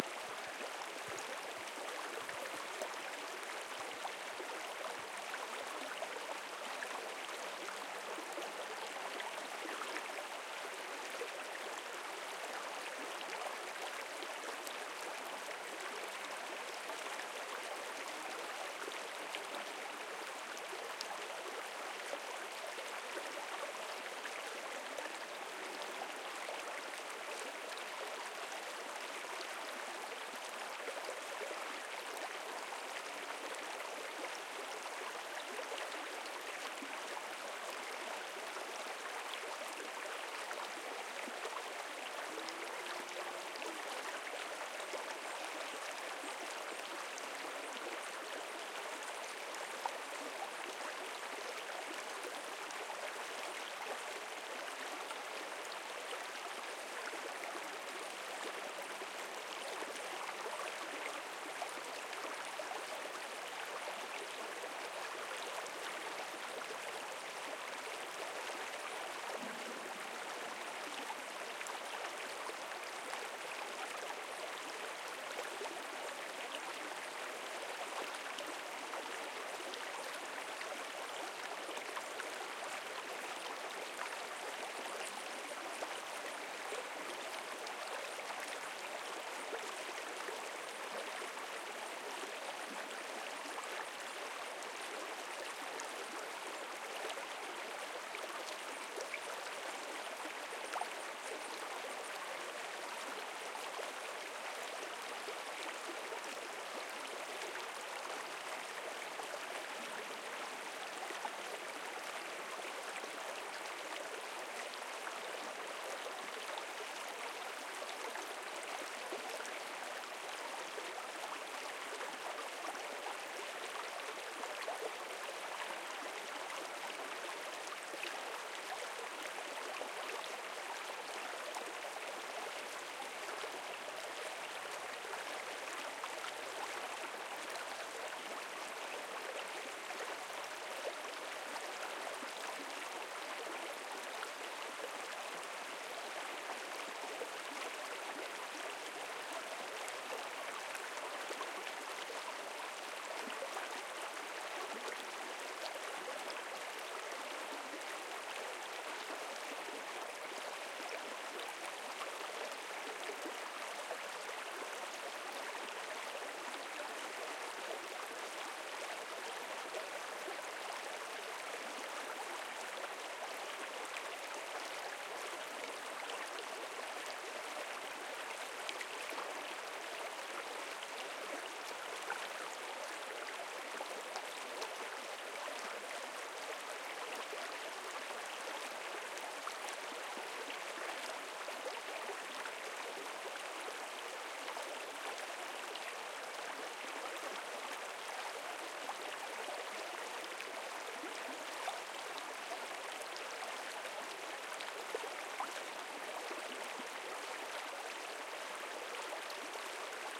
river or stream thick soft flow bubbly1
river or stream thick soft flow bubbly
stream, flow, river, bubbly, thick, soft